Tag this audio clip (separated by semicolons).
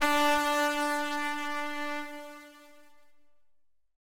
free; piano; concert; loop; string; layer